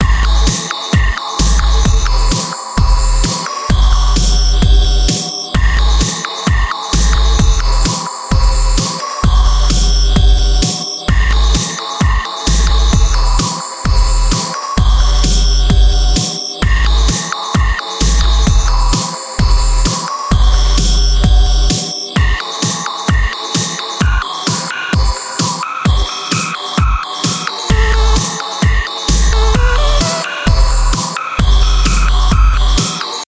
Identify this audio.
Spacetime Loop
synthetic, background, beat, loop